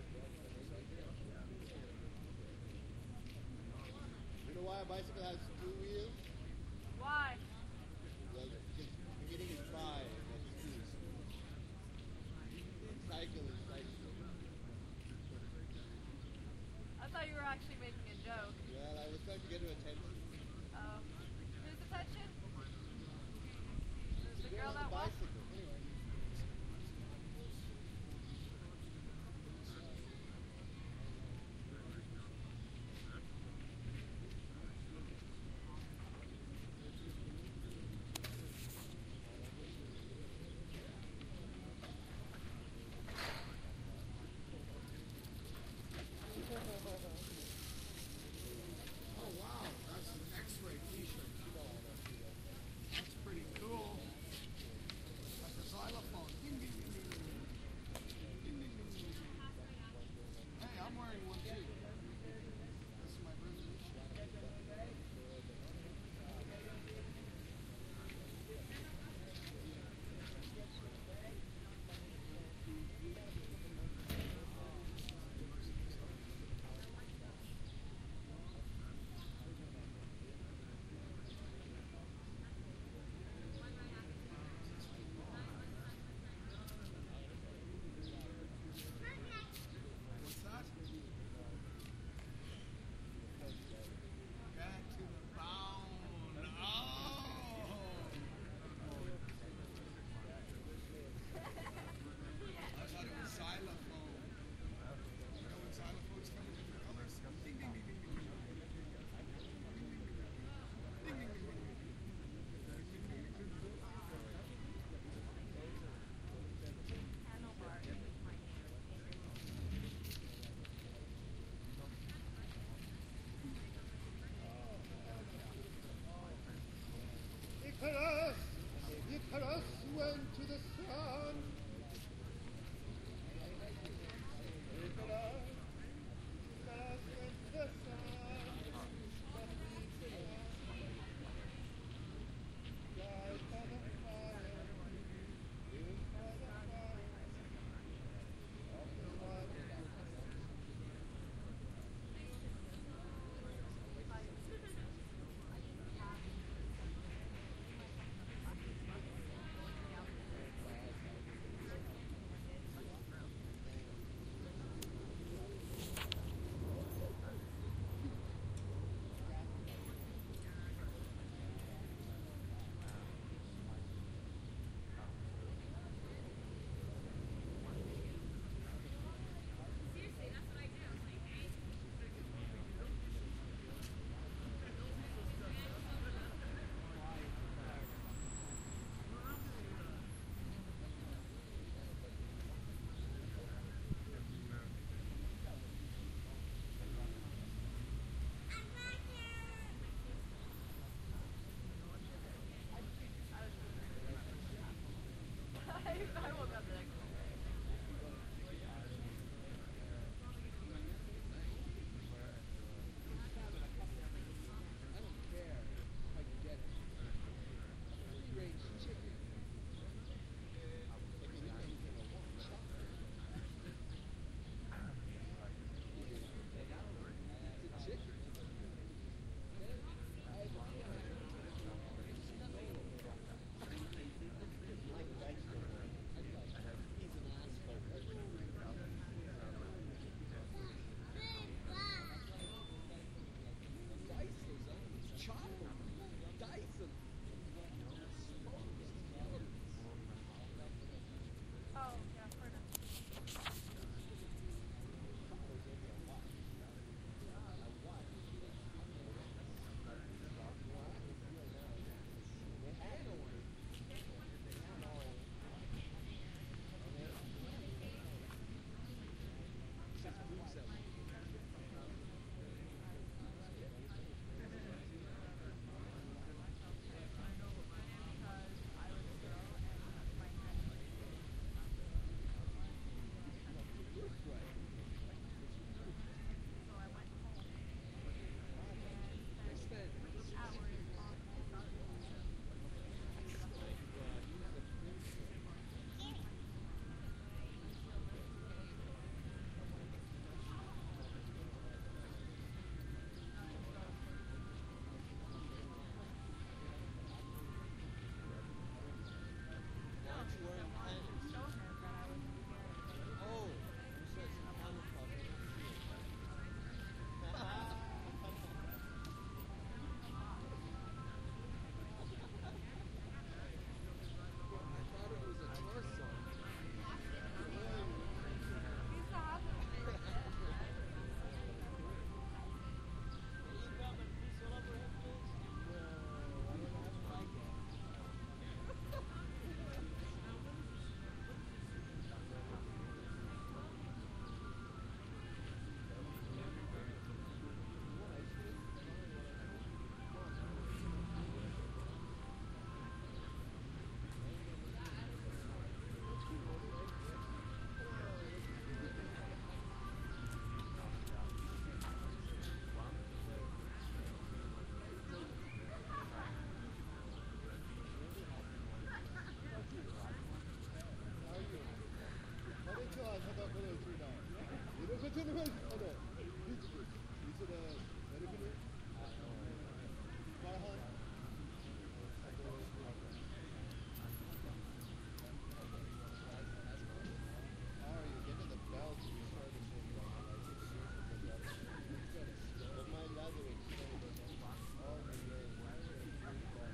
062110 kensington park
Stereo binaural recording of a city park. Mostly adults talking in the background. One man sings a little bit.
walla, city, stereo, inner, park, town, binaural